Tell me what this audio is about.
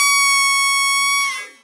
toy duck
quack, duck, toy, squeaking